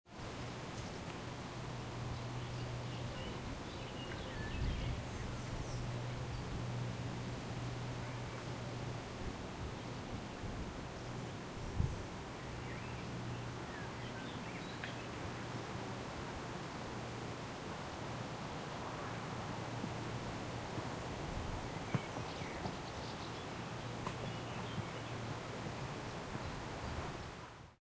Open field winds summer ambience
Stereo recording of summer ambience in an open field. Sounds of birdsong, wind in trees, distant activity and traffic. Recorded on a breezy day
nature, birds, field, light-wind, breeze, woods, wind, distant-traffic, UK, birdsong, field-recording, ambient, trees, summer, ambience, forest